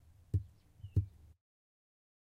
footsteps going up steps